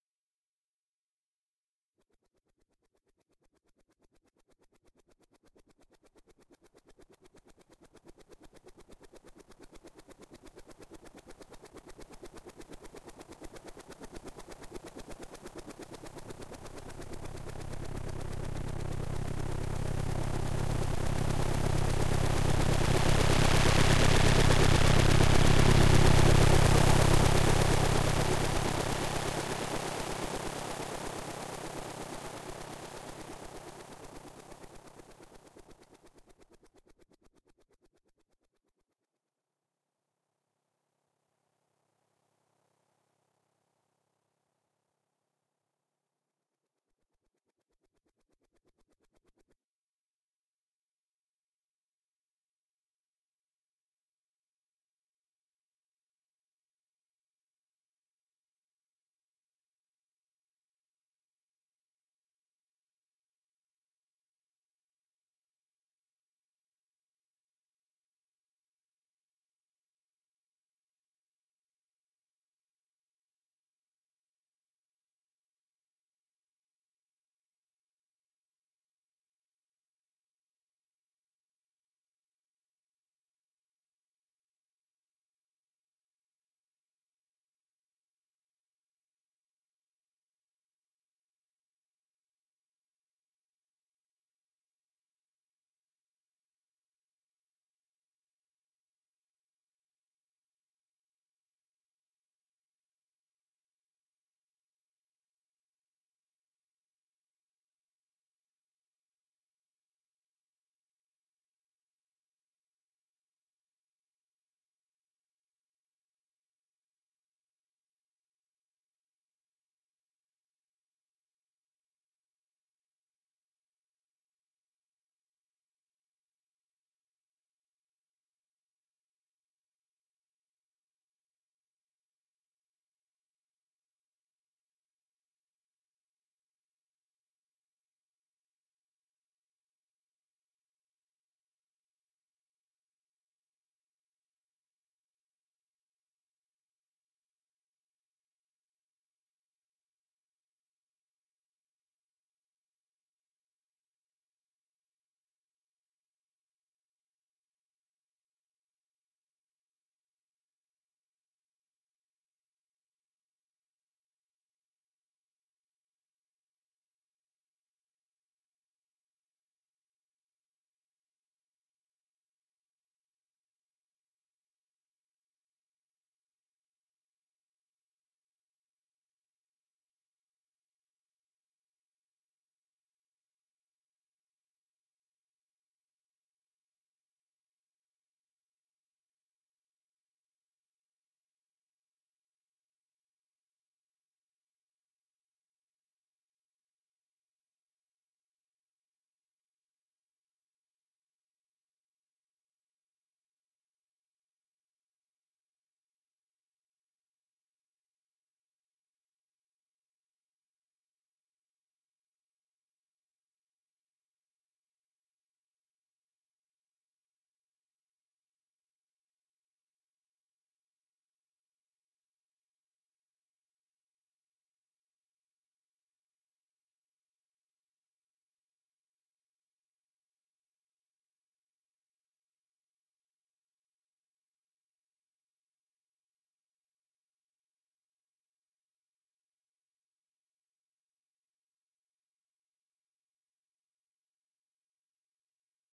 Hellicopter Pass
Sound Design Project I did for school. All done with synths and automation.
Aircraft, Design, Engine, Flyby, Helicopter, Sound, Vietnam